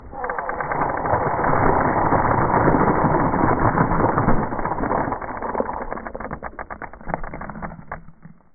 Earth's Crust Depressing
A sound to go along with the idea of a glacier depressing Earth's crust.
Created by slowing down pieces of the following sounds:
collapse, depress, depressing, geo